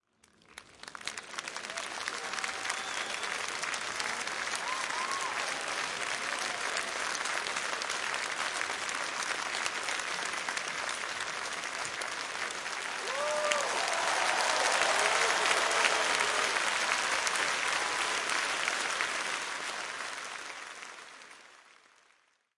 crowd applause theatre
crowd, applause, theatre